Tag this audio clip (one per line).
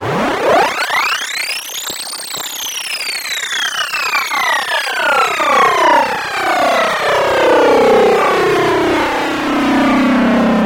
Space
underworld
Strings
strange
design
Sounds
Texture
Binaural
Ringmod
Combfilter
Multisample
Drone
universe
SciFi
Dark
futuristic
Synth
Ambient